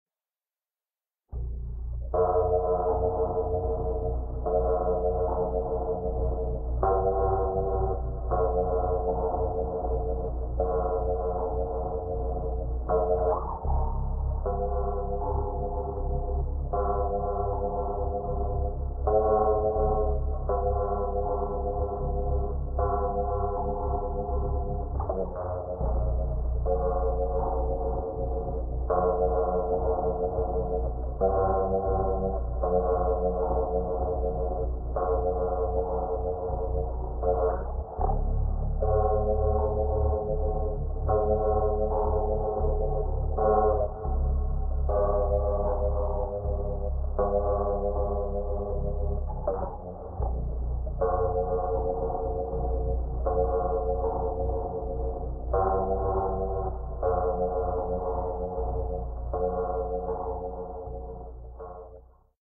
i try to make dark space by electric guitar....
Slow, Down, guitar